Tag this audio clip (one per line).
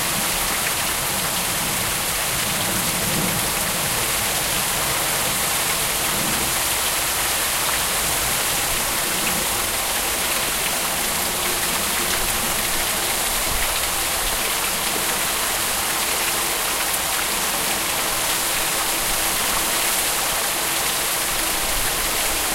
cascada
paisaje
sonoro
uem